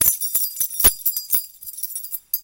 This is a recording of the sound of shaking keys.